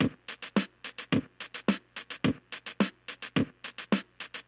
a close mic'd speaker of a child's toy keyboard playing a rock drum pattern at 107 bpm